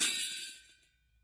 Bwana Kumala Ceng-Ceng 08
University of North Texas Gamelan Bwana Kumala Ceng-Ceng recording 8. Recorded in 2006.
bali, percussion